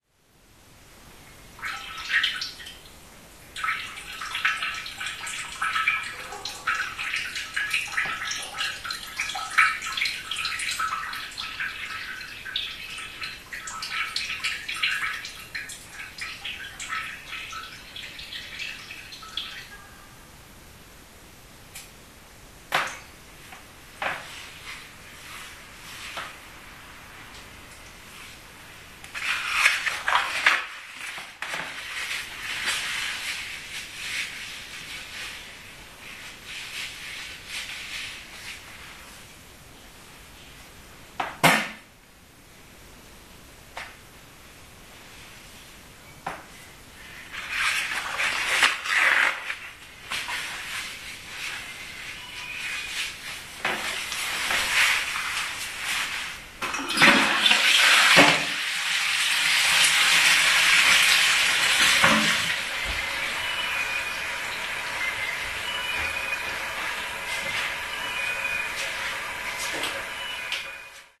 22.08.2010: about 20.30. the sound from the toilet in my flat. tenement on Gorna Wilda street in Poznan.
domestic-sound, field-recording, flat, pee, peeing, piss, poland, poznan, swoosh, tenement, water